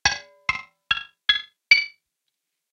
percussive guitar harmonics
Lightly fretting my guitar and striking the strings with the side of my pick
Guitar, sound-effect